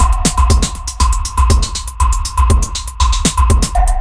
Ankylosing drum loop
A weird electronic drum loop.
loop, psychedelic, drum, techno